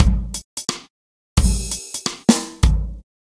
eardigi drums 31
This drum loop is part of a mini pack of acoustic dnb drums
amen, bass, beat, break, breakbeat, dnb, drum, drum-and-bass, drum-loop, drums, groovy, jungle, loop, percs, percussion-loop